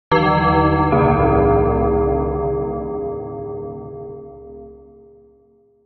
dark bell
dong, horror